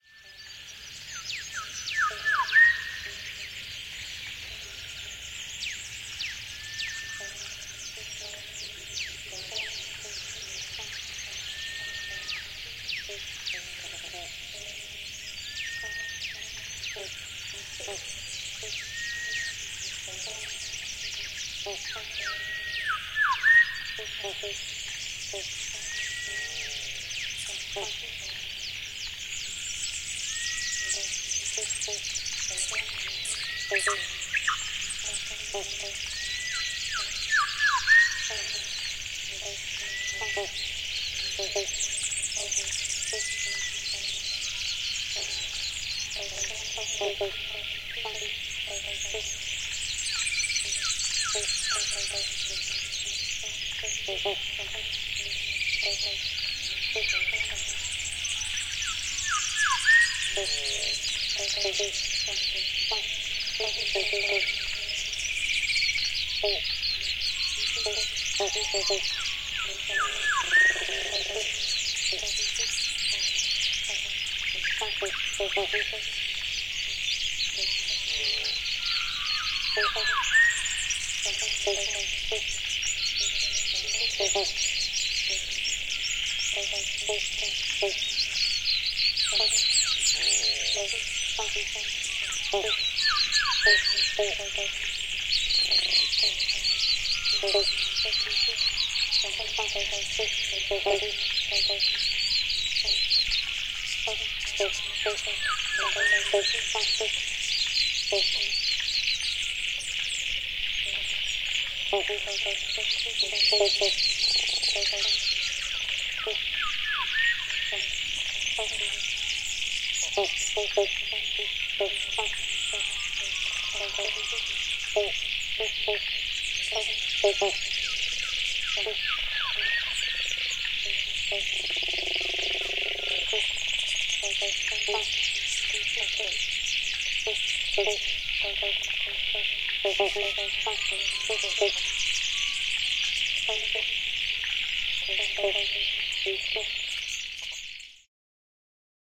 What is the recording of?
Stereo Mic Experiment #3
This is a little experiment. I had two stereo mics recording into two separate recorders. A rode NT4 into a Zoom 4 and an MS set up using a Sennheiser 416 paired with a MKH-30 staight into the Zoom H4n. The Rode NT4 was positioned by the lake, surrounded by wonderful frog sounds, the MS was 80 metres away from the lake. I rolled on both recorders and made a sync point with a loud clap near the Rode mic.
Using Reaper, i synced the two tracks, then did a very long cross fade (almost the duration of the track i.e. two minutes, twenty seconds.) In this piece the sound perspective travels from the distant mic to the lakeside mic. The idea being that i would create the sound illusion of moving closer to the lake.